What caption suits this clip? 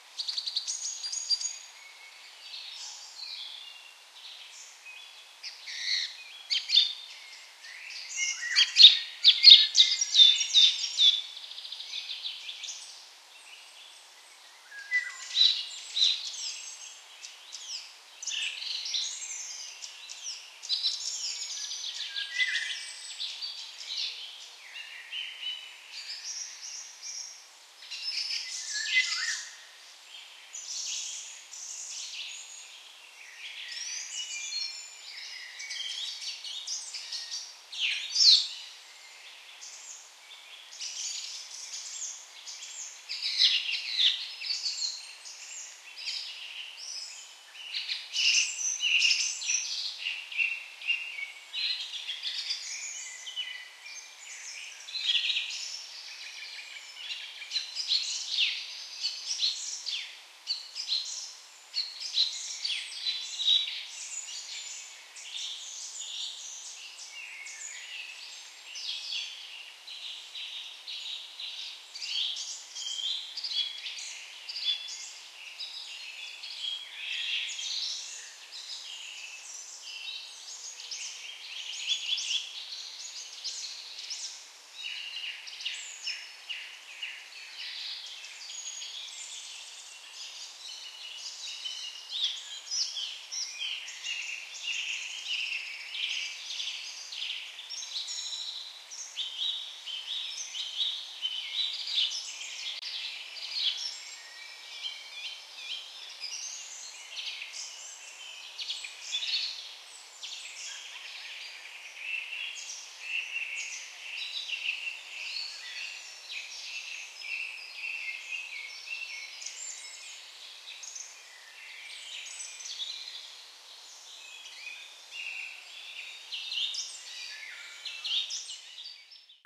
birds processed
Complete backyard recording of a blackbird, processed with EQ, compressor, Noise-Gate.